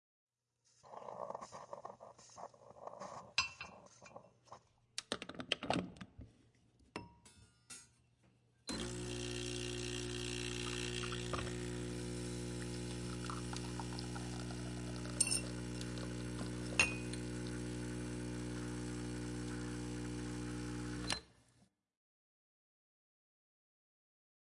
Domestic espresso machine. Maquina de café espresso. Turn on and off. Encendido y apagado. Se escucha cuando se pone el filtro y como va cayendo el cafe en la taza. Grabado con una grabadora de audio sony, en una cafatera espresso autamatica marca Cusinart.